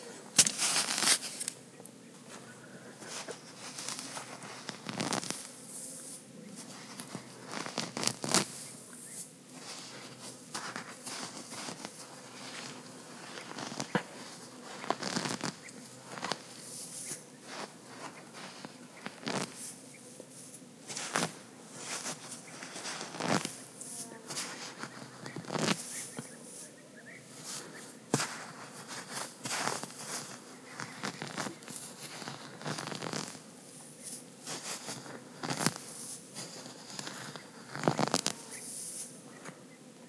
sound made excavating with a stick on the sand of a dune, along with noise of plants being uprooted / sonido producido al escarbar con un palo en la arena de una duna, y ruido de plantas al ser arrancadas
earth, excavation, field-recording, nature, sand